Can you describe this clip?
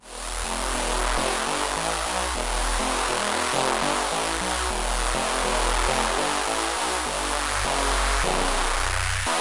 biggish saw synth e e g b 102 bpm-29
biggish saw synth e e g b 102 bpm